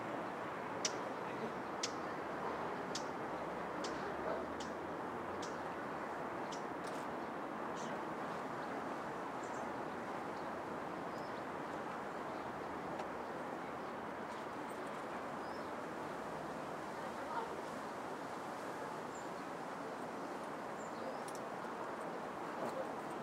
Ambiente - calle sin trafico
street Environment on a city without traffic
MONO reccorded with Sennheiser 416
street, ambience